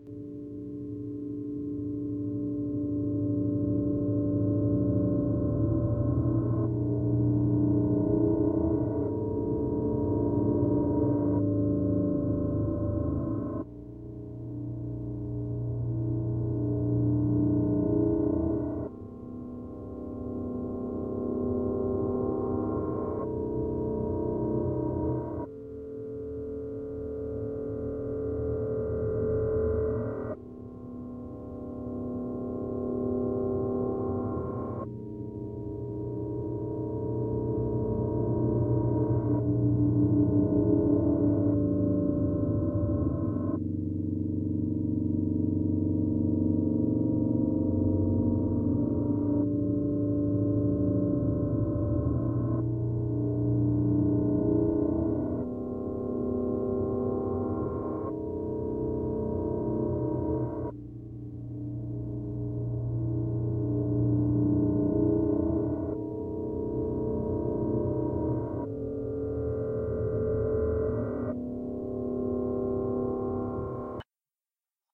music of the otherside
I wanted to test out my new electric piano. so i did a little melody, added some effects in audacity and bam! i created a very strange and eerie melody.
use it for for videos, games, i don't care. Just credit is all i ask.